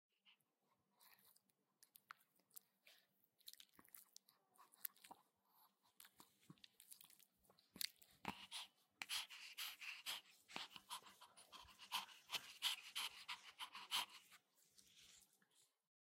20170520 Chihuahua Dog Breathing and Licking 2
Chihuahua Dog Breathing and Licking, recorded with MXL Cube -> Focusrite 2i4.